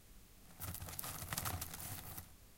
Short potpourris rustling sound made by stirring a bowl of it